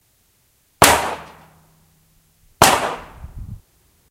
.22pistol targetside
This sound is recorded behind the target, of the shot and impact
fire, gun, pistol, 22, impact, target, report